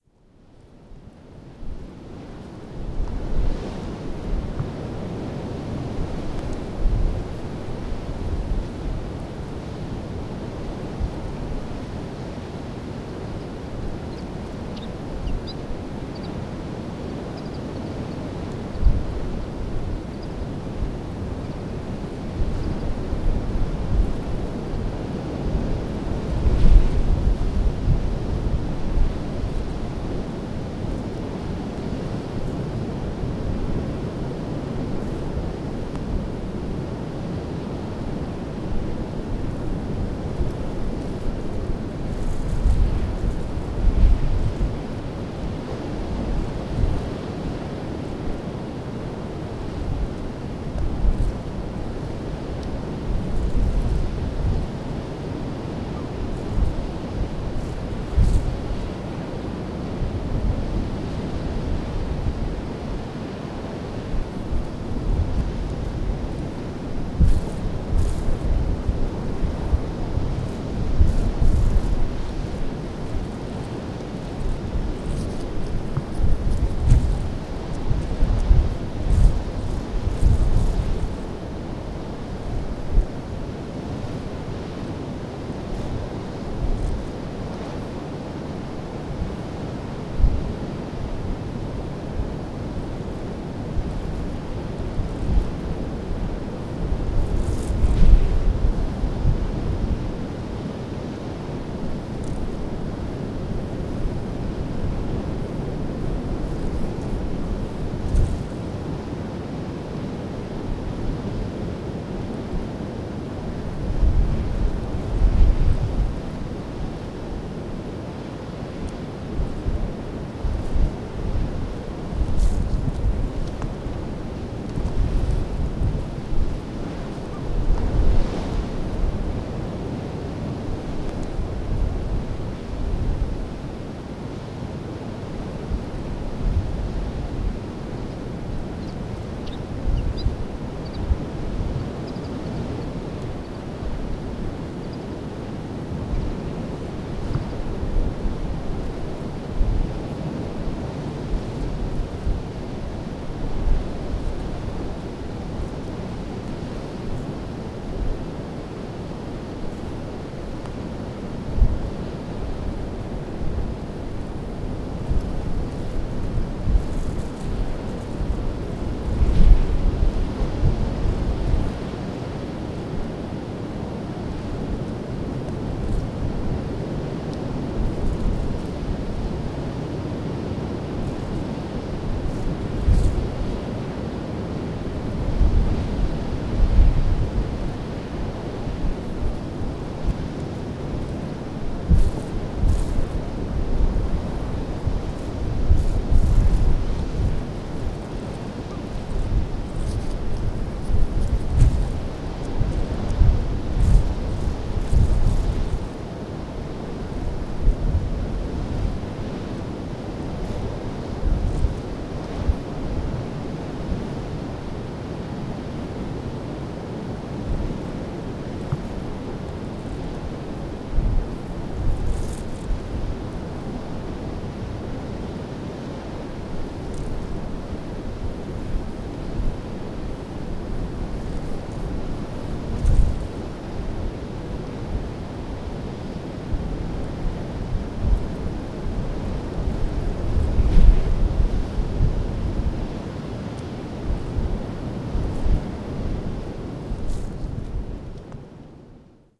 Recording on a top of the cliff in Pembokshire. Sound of wind and sea far below.